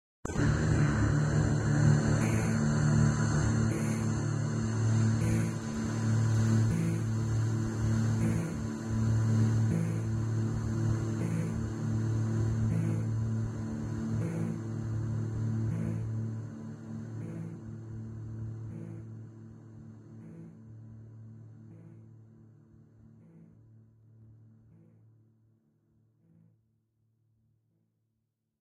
voice drone
strange vocal effect
drone, vocals, voice